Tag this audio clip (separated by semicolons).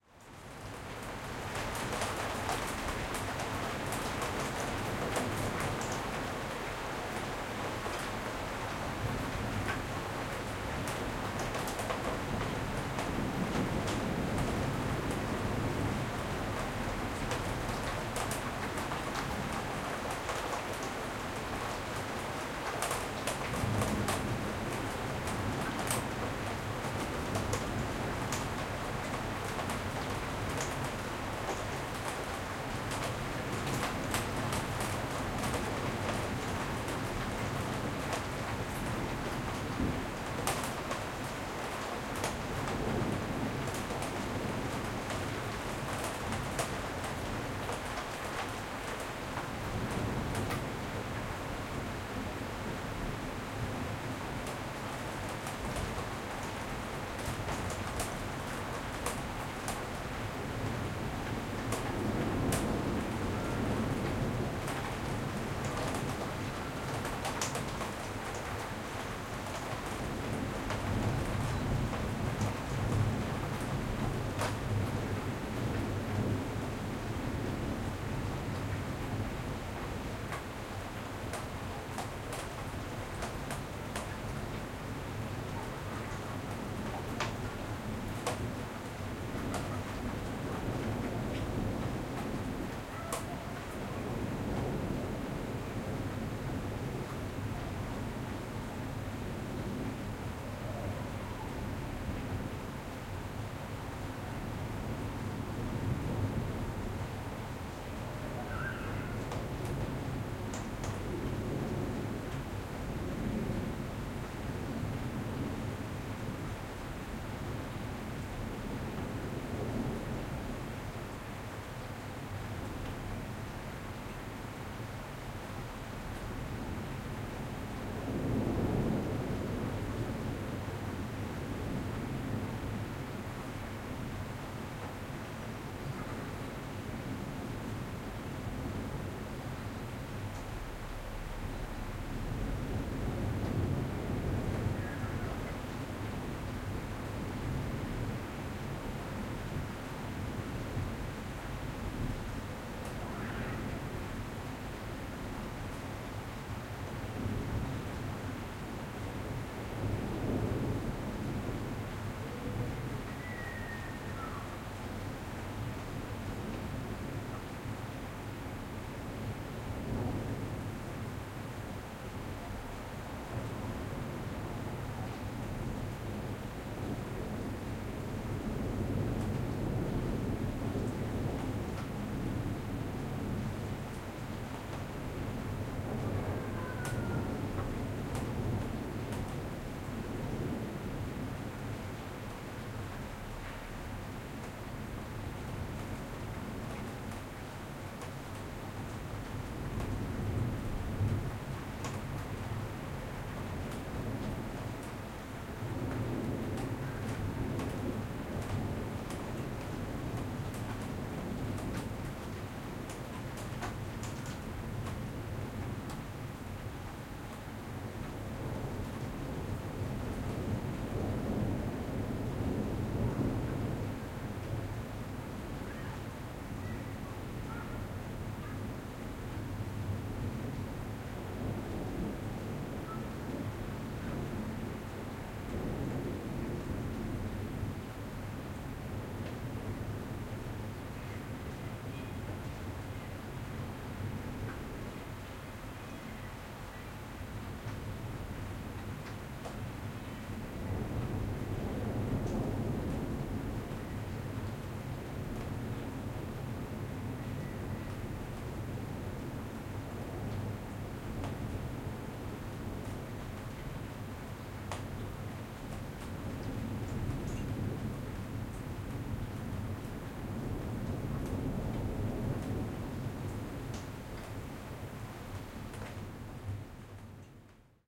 inside; storm; dripping; garden; window; weather; balcony; thunderstorm; raindrops; rain; ambient; city; drop; open; raining; drip; drops; light; wind; ambience; nature; field-recording; water; thunder